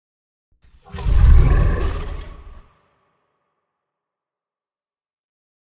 Dragon roar 1
Dragon sound made by Stijn Loyen
Dragon, Fire, Monster, Roar